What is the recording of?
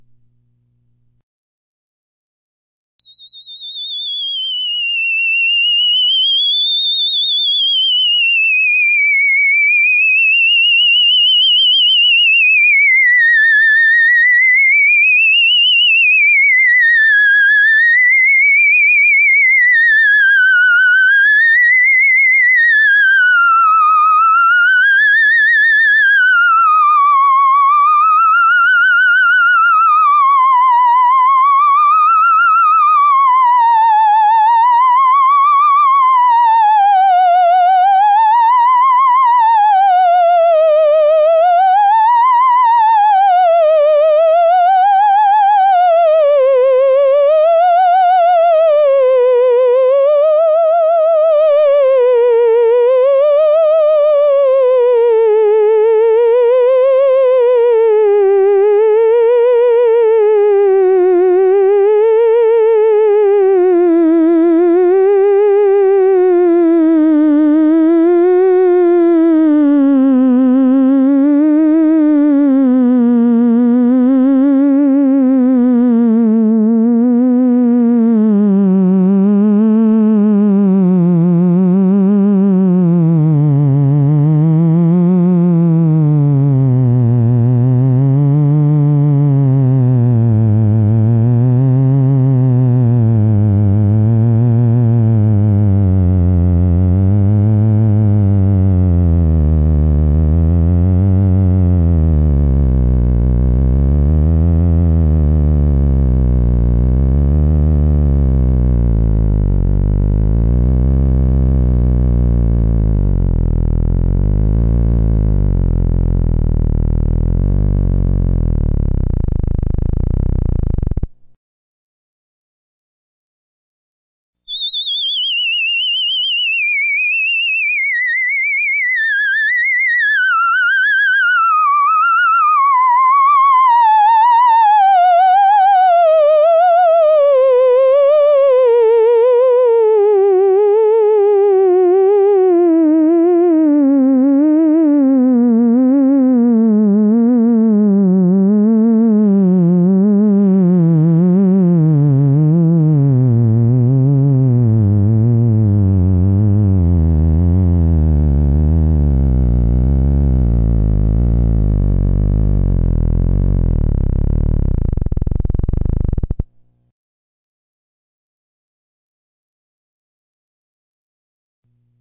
This file contains 2 versions of a theremin "spiralling" down from very high to very low. Each is a different length and each is varied in terms of vibrato. Infinite sonic possibilities in the hands of anyone who loves to experiment!
Every effort has been made to eliminate/reduce hum and distortion (unless intentionally noted).